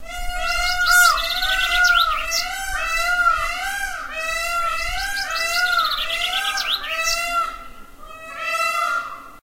Plaka Forest
A few peacocks calling.